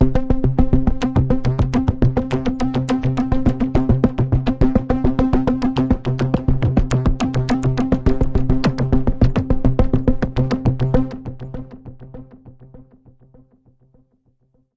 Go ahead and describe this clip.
130 BPM arpeggiated loop - C3 - variation 1
This is a 130 BPM 6 bar at 4/4 loop from my Q Rack hardware synth. It is part of the "Q multi 005: 130 BPM arpeggiated loop" sample pack. The sound is on the key in the name of the file. I created several variations (1 till 6, to be found in the filename) with various settings for filter type, cutoff and resonance and I played also with the filter & amplitude envelopes.
130bpm, arpeggio, electronic, loop, multi-sample, synth, waldorf